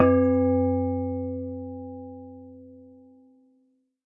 Tube hit 02, low
A real industrial sound created by hitting of a metal tube with different elements (files, rods, pieces of wood) resulting in more frequency range of these hits.
Recorded with Tascam DR 22WL and tripod.